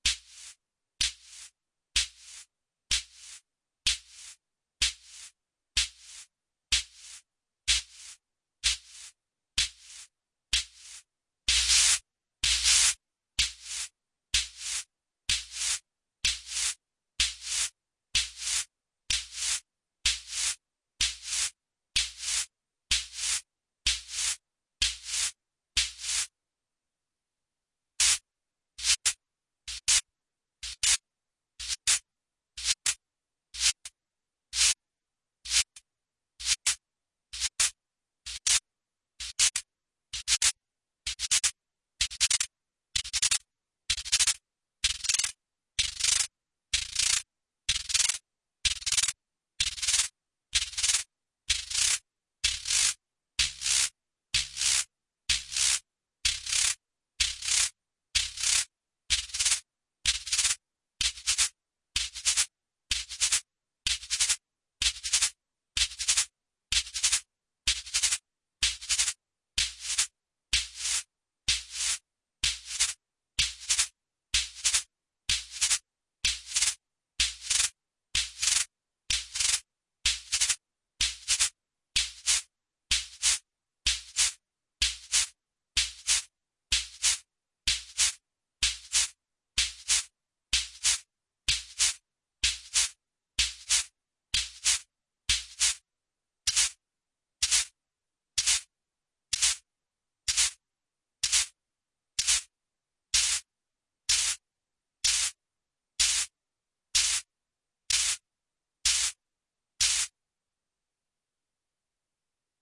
This is the uncut recording of how I made the "minimalattackypercasdf" samples in the "minimal" pack.
If it's useful to anyone I'll be pleasantly surprised.
percussion, minimal, LFO